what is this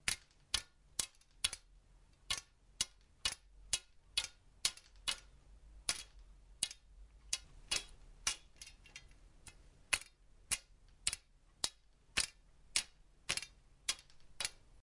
Foley of swords.